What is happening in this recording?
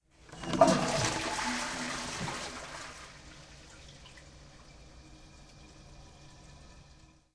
Pulling a WC chain
This sound was recorded at the Campus of Poblenou of the Pompeu Fabra University, in the area of Tallers in men bathroom, corridor A .It was recorded between 14:00-14:20 with a Zoom H2 recorder. The sound consist in a liquid and low frequency sound produced when the water flows on the bath.
bath, bathroom, campus-upf, chain, UPF-CS12, water